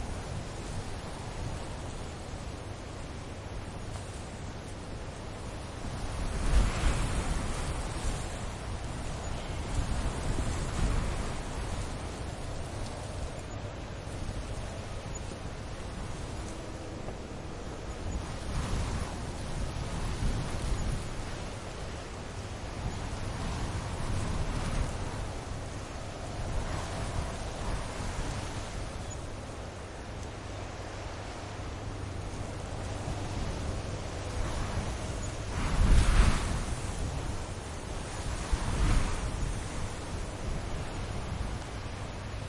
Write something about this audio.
wind through flowers prickly jewish cemetery +distant city Casablanca, Morocco MS
Casablanca, cemetery, flowers, prickly, through, wind